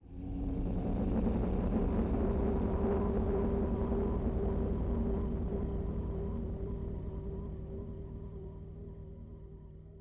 Horror dark sound 1
Effect sound made out with propellerhead edition 5.
Free use, make me know if you use it.